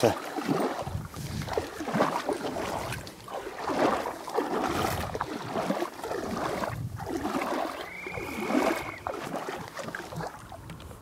Wading through water
Duck-shooting protester wading towards shore, first day of duck season, Moulting Lagoon, Tasmania, 8.24 am, 09 March, 2013.